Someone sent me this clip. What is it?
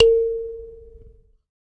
SanzAnais 70 A#3 forte a
a sanza (or kalimba) multisampled
african, kalimba, percussion, sanza